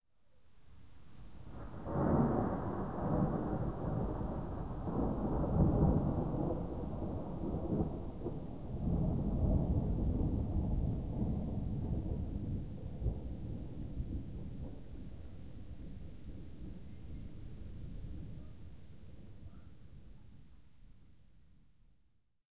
Distant thunder from a passing thunderstorm. Recorded by MP3 player. Location: Pécel. (near Budapest)Date: 11st of June, 2008.
field-recording, lightning, storm, thunder, thunderstorm, weather